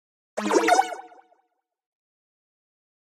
explosion beep kick game gamesound click levelUp adventure bleep sfx application startup clicks